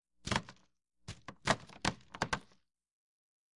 Approximately 10-15 T-Nuts with teeth were dropped onto a table, in a variety of rhythms.
All samples in this set were recorded on a hollow, injection-molded, plastic table, which periodically adds a hollow thump to each item dropped. Noise reduction applied to remove systemic hum, which leaves some artifacts if amplified greatly. Some samples are normalized to -0.5 dB, while others are not.